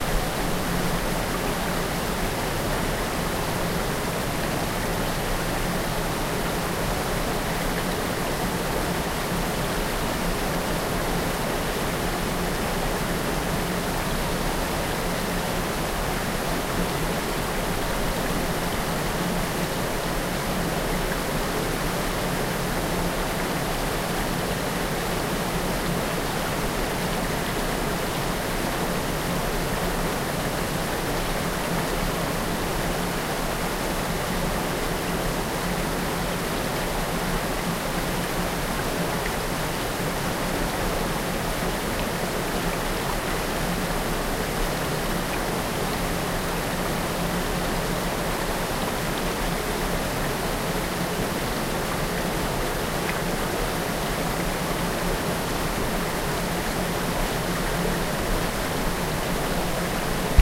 River Mirna Waterfall Near Mill
Waterfall sunds in Autumn. Recorded in Croatia, Istria region at Kotli.
Autumn, Fast, Field-Recording, River, Rocks, Stream, Water, Waterfall